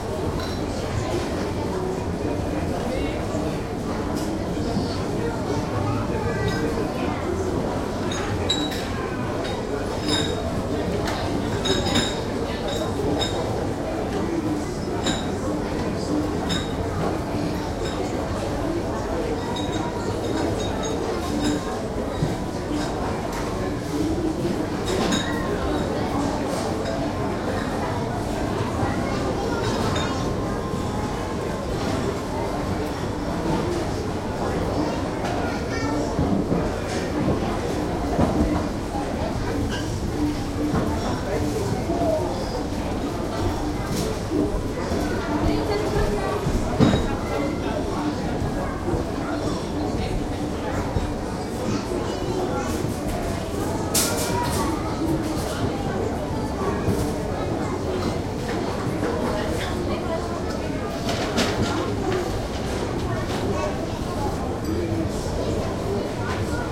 Farmer's market rear
4ch-surround field recording of a large farmer's market near the German Baltic coast.
People (LOTS of people) are milling about in a hall about the size of a stadium inspecting the merchandise, consisting mainly of groceries, marmelades and cheesy souvenirs. The visitors are all speaking German, but this can not be easily recognized from the recorder's POV.
Good, neutral atmo for motion picture or radio play backdrops.
Recorded with a Zoom H2, these are the FRONT channels, mics set to 90° dispersion.
ambient, field-recording, commercial, crowd, neutral, rooms, surround, children, hall, glass, market, ambience, clinking, backdrop, noisy, people, loud, atmo, room